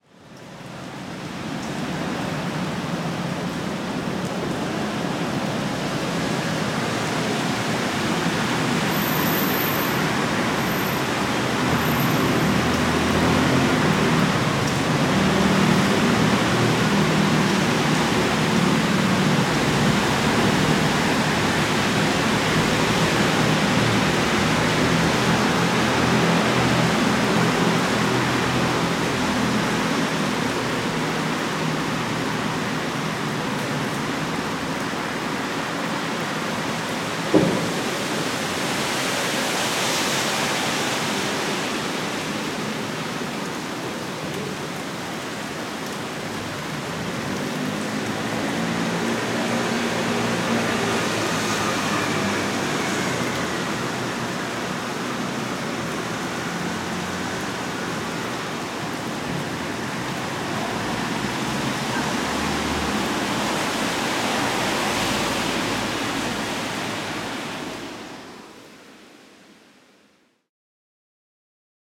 Monophonic's Capture of Rain in Paris with a lot of circulation cars/bus/etc...